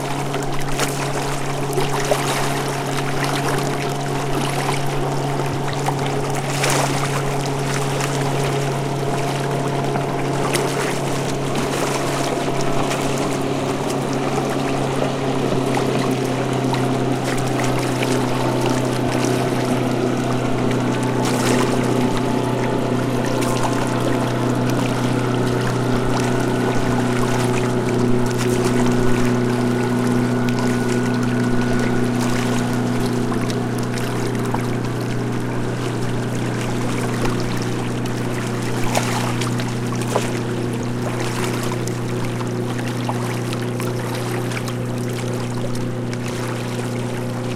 Boat on the Gulf of Finland. There is the sound of waves and a passing boat in the distance.
boat, gulf, lake, motor, water, waves